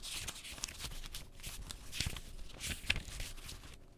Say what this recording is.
Papers Rustling
Someone rustles through papers
home-recording, rustle, paper, pages, rustling